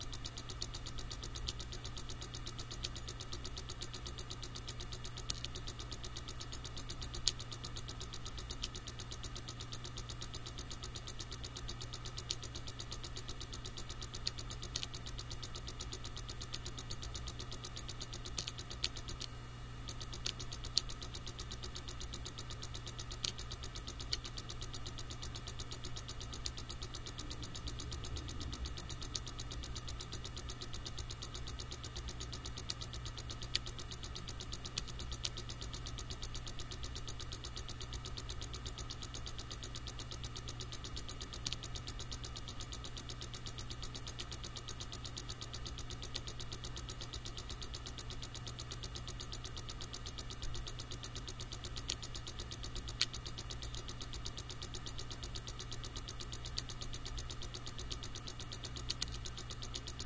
MTrk Internal Hard Drive Defrag Clicks Clanks 2 FSP4824
There are three of these files. I used the Microtrack to record them. I put the mic on an extension and stuffed it inside of an already noisy drive and ran a defrag. These files are samples of the different types of noise that I got. Basically it's a close perspective on a working/struggling hard drive.
clanks, clicks, computer, defrag, drive, hard, internal